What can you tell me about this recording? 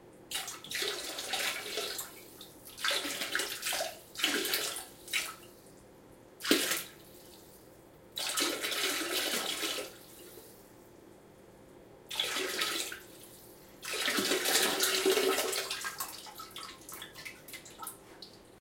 Dumped some old homemade soup out and decided, hey, this is a good chance to make a sound file :)
Great for someone being sick [out of either end]; simply add your own grunts and groans or whatever...